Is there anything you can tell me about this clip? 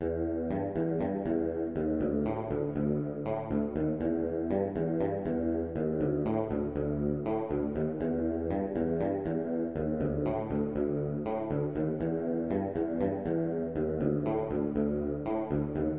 comin as you are (consolidated)
i tried to recreate the famous opening bass line from nirvana "come as you are"
chorus, heavy, korg-m1, kurt-cobain, grunge, rock, korg, guitar, nirvana, metal, midi, chorus-effect